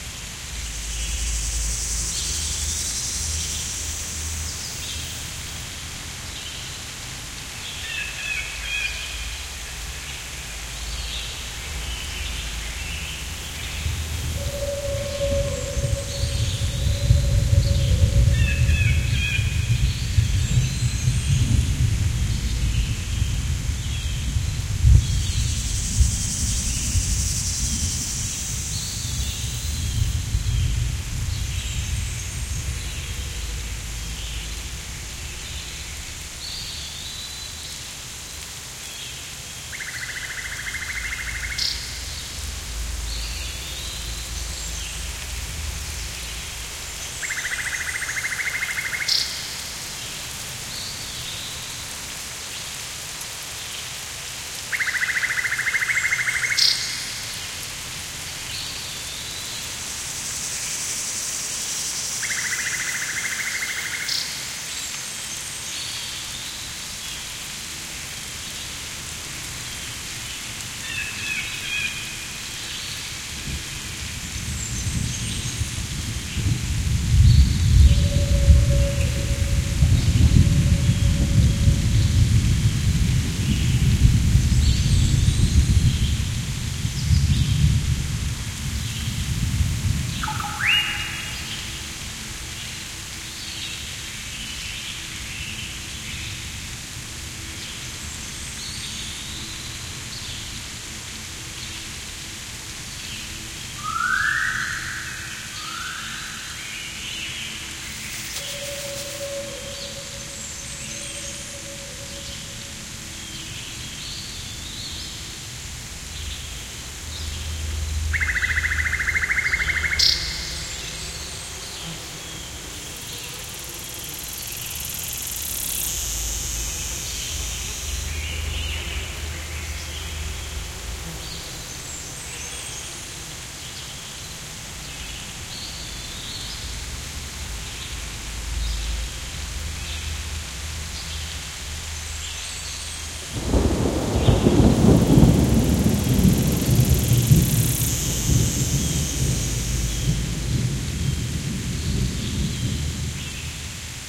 Ambient-01 JungleHills
ambient track of a jungle setting with light rain.
jungle, rain-forest, ambient